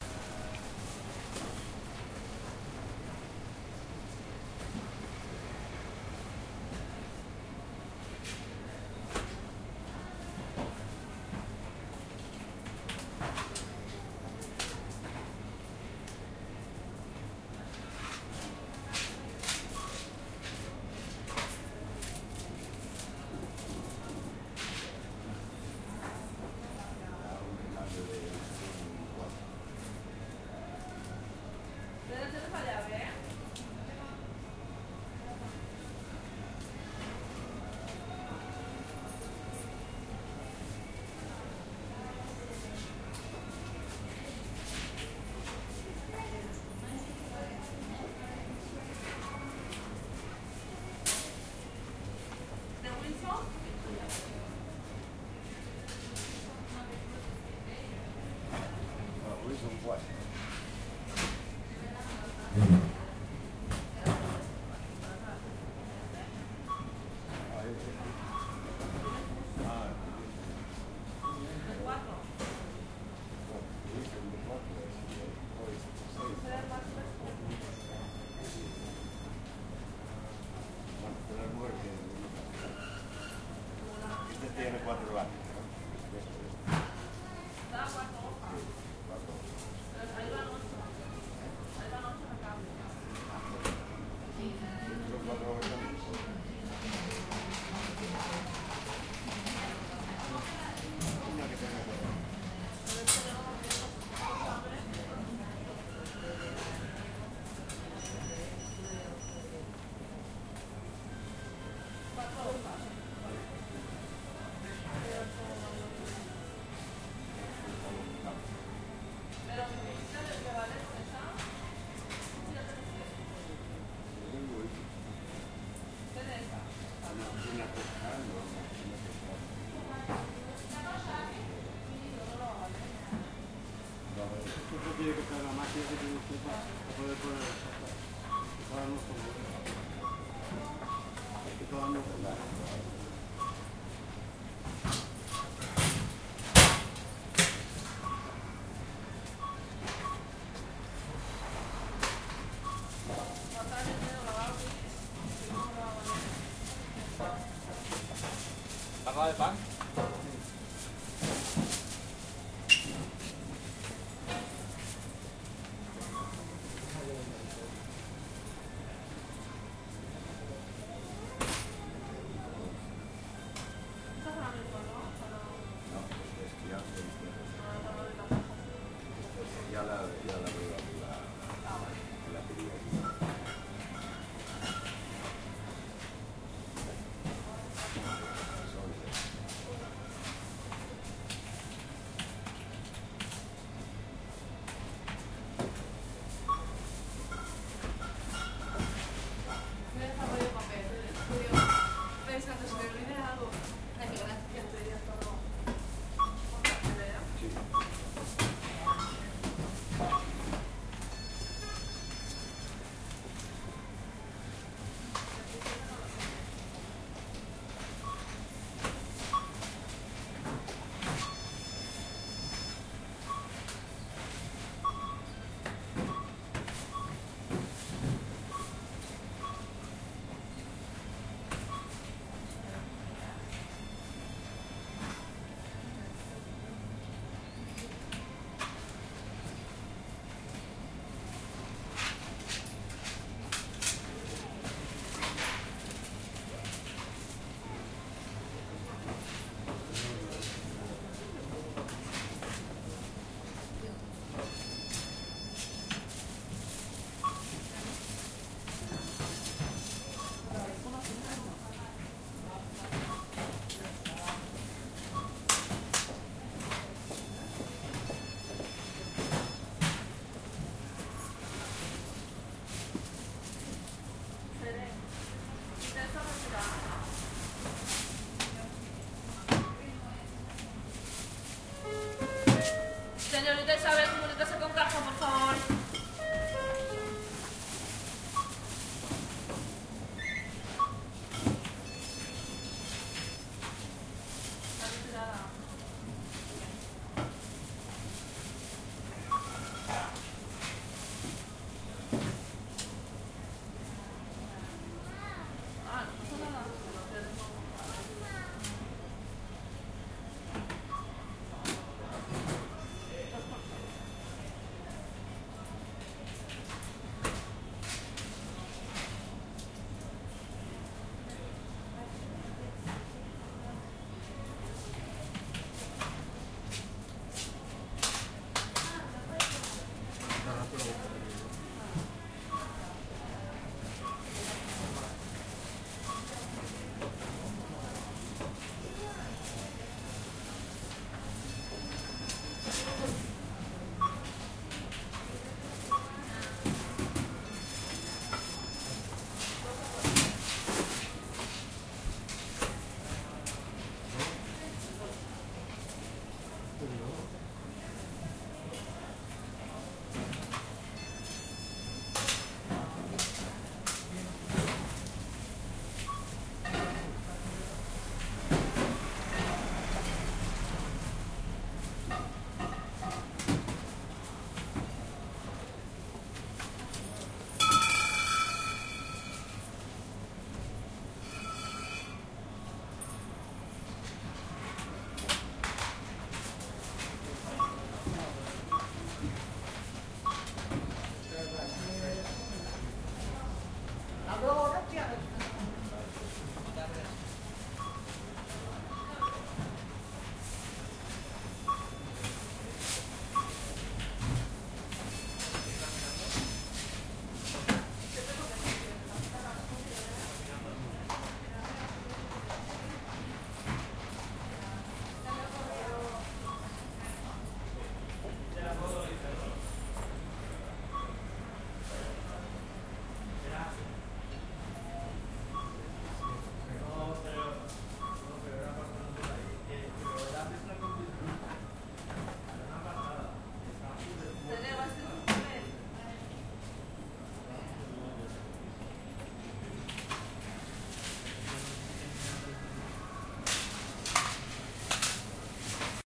ambiance sonore magasin près de la caisse
sound in a market en spain with people, sound of bottle, money, sound machines, walking people.